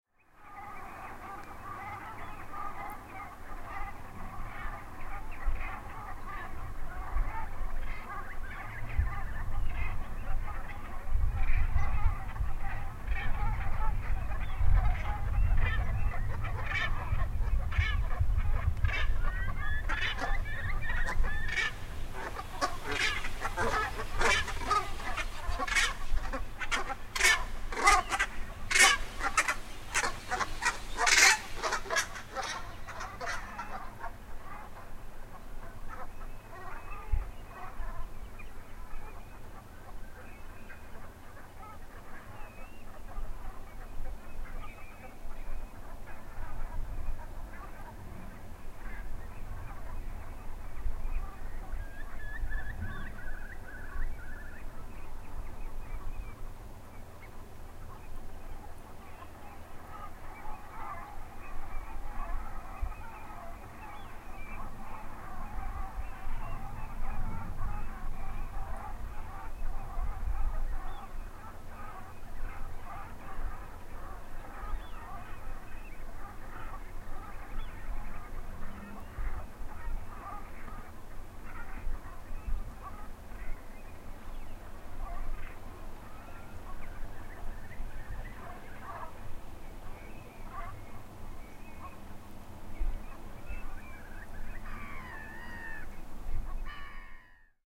Brent geese flying overhead in Essex Wetland
Numerous distant Brent geese which finally fly overhead and away. Recorded on a windy winter afternoon near Skippers Island, Hamford Water Nature Reserve, Essex, Uk. Recorded with a Zoom H6 MSH-6 stereo mic on a windy winter (January) afternoon.
Hamford-water-nature-reserve, uk, birds, honking, flapping, bird, msh-6, flight, Hamfordwater, Brent, flapping-wings, wings, essex, birdsong, zoom-h6, msh6, h6, field-recording, estuary, outside-ambient, ambience, hamford-water, stereo, nature, England, ambi, geese, flying-geese, Brent-geese